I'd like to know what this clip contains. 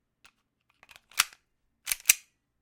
Glock19 magchange
Magazine change of a Glock19 Handgun.
gun, reload, magazine, handgun, pistol, glock